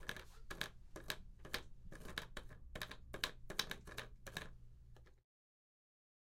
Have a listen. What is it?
petal separating from the flower
roller, pavement